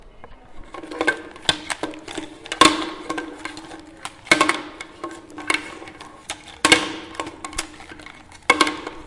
mySound SASP 24
Sounds from objects that are beloved to the participant pupils at the Santa Anna school, Barcelona.
The source of the sounds has to be guessed, enjoy.
cityrings, santa-anna, spain